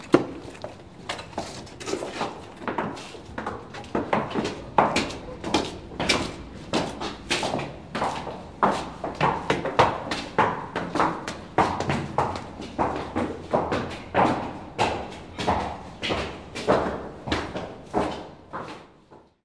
Steps of 3 persons entering into staircase, then steps on wooden stairs in old buliding. Recorded on Zoom H4n using RØDE NTG2 Microphone.